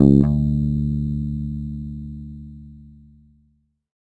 First octave note.
bass, guitar, multisample